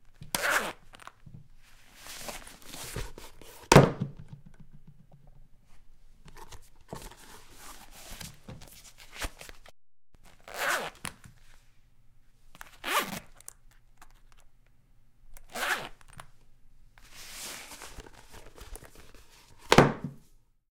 unzip leather boots, take off and drop on wood floor
boots, drop, floor, leather, off, take, unzip, wood